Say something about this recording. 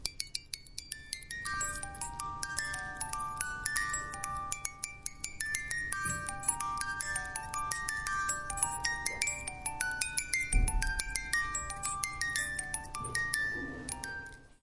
This is a small music box which play well known classical piece of music.